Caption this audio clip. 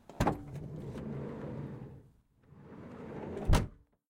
16 - Sliding door - opening and closing
Close perspective, inside
CZ, Czech, Pansk, Panska